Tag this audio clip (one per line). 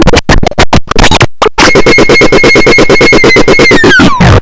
murderbreak
glitch
circuit-bent
bending
core
coleco
rythmic-distortion